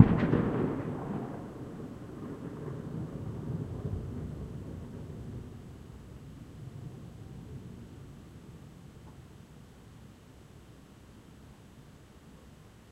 Large explosion - dynamite during a fiesta in the Sacred Valley, Cuzco, Peru. Long natural mountain echo.Recorded with a Canon s21s